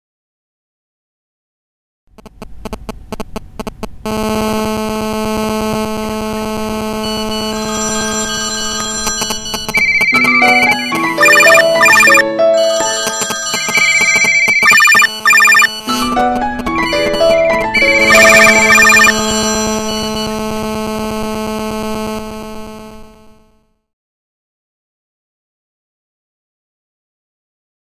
The pre-show anti-mobile phone track created by and played at The Maltings Theatre in Berwick-upon-Tweed. This is a 30-second track with no speech, which starts with a mobile phone interference, leads into a cacophony of mobile ringtones and text alerts, and ends with the sound of someone saying 'shhh'. It is played when the house lights go down to remind audiences to turn off their mobile phones.